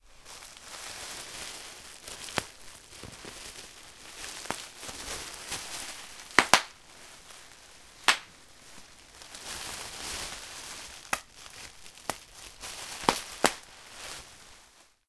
PoppingBubbleWrap home Jan2012
In this sound I am playing with a small piece of bubble wrap and popping the bubbles. Recorded with a zoomH2
pop, plastic, bubble-wrap, dare-9, rustle, field-recording, shipping, popping, packaging